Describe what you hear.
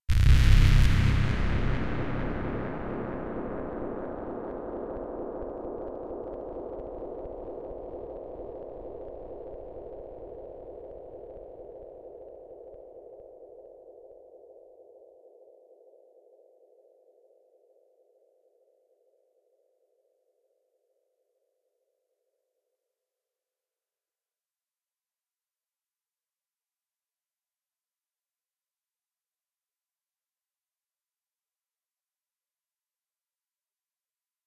synthetic industrial sounddesign